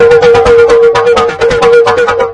For the last one I've mixed two sounds and I did inversion. So I increased echo, the height and variation of the height. I created a dynamic sound.
inversion, mix, sinusoid